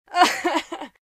Woman Crying in Pain
A Sad sob sound Woman in Studio conditions recorded with Zoom H6 Stereo. Authentic Acting!